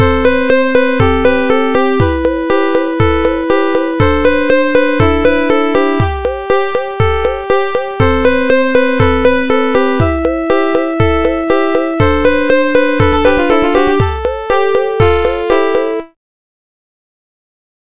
Made it with LMMS.
Horror, Town, SFX, Effect, RPG, Ghost, Maker, Spring, House, Pack, Sound, Cartoon, FX, Scary, Game, Jump